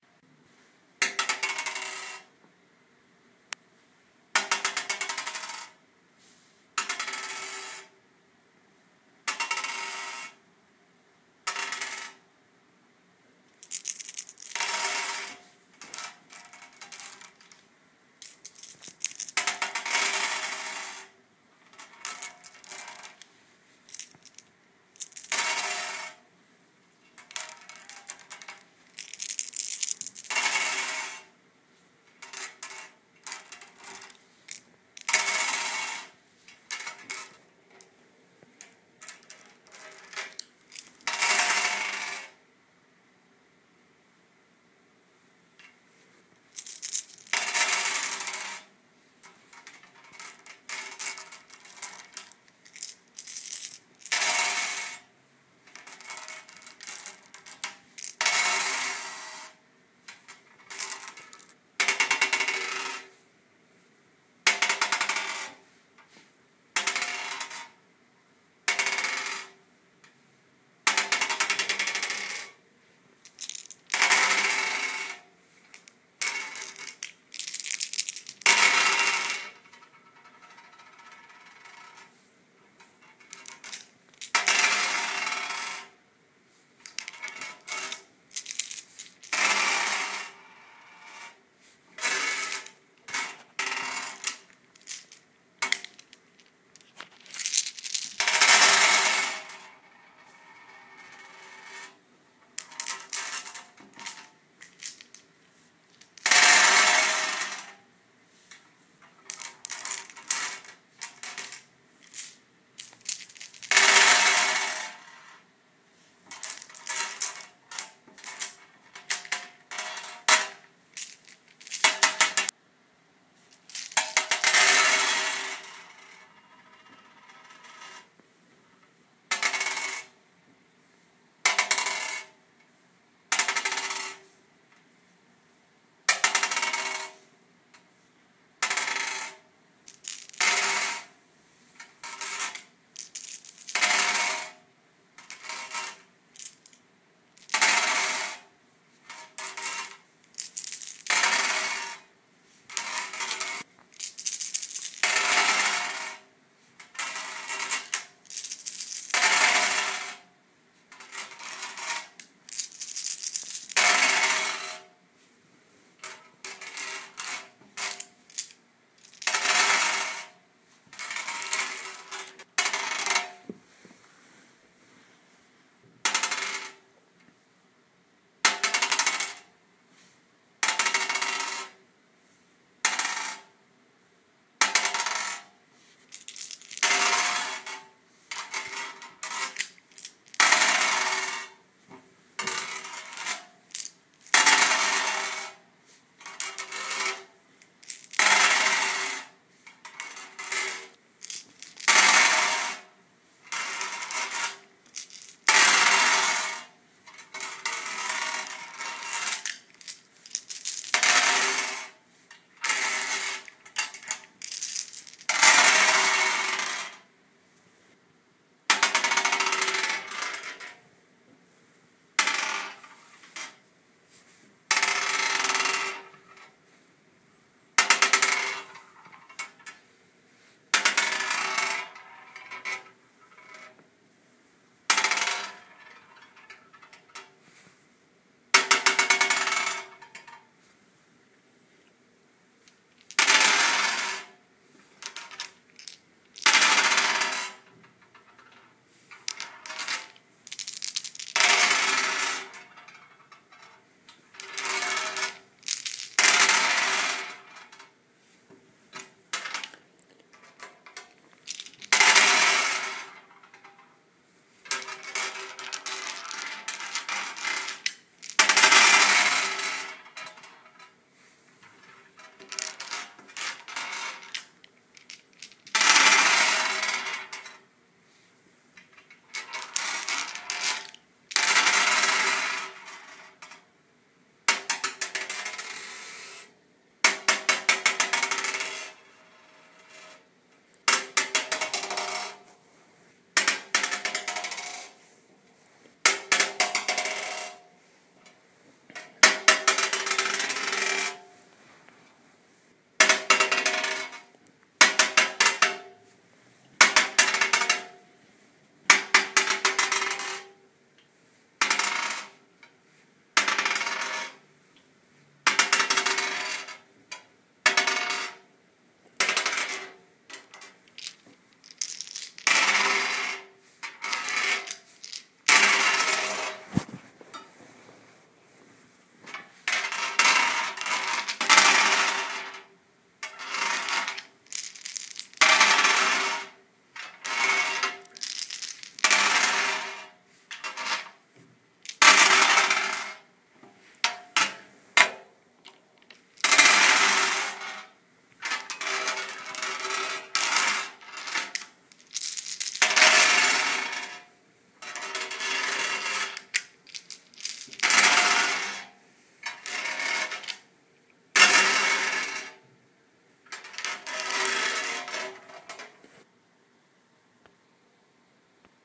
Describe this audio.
DiceRollingSounds Glass
This file contains the sounds of dice rolling on a glass surface.
Dice rolling sounds. Number of dice: 1, 5, and 10+ samples. Type of dice: d2 (coin), d4, d6, d8, d10, d12, d20, d100 (two d10's). Rolling surfaces: wood, tile, and glass.
d10, d100, d12, d20, d4, d6, dice, die, game, roll, rolling, rpg, throw, throwing